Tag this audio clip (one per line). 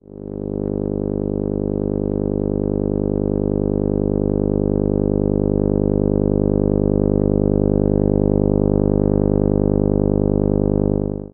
ground
loop